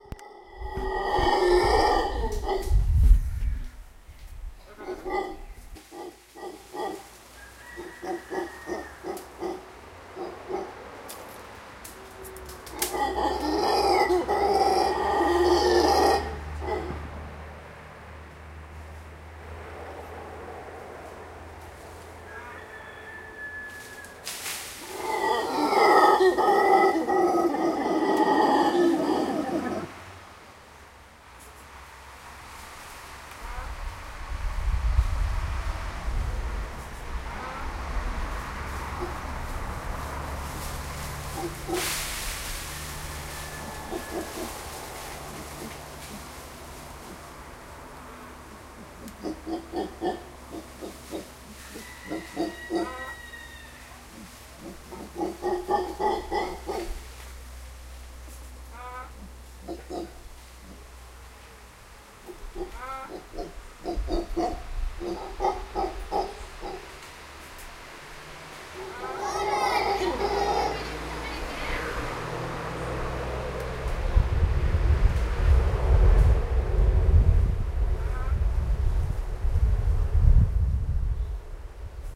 Monos Aulladores - Howler monkeys
A group of howler monkeys hanging on the trees near a street.
Un grupo de monos aulladores sobre los árboles frente a una carretera.
rainforest, street, tropical, monkeys, monkey, rain-forest, jungle, animal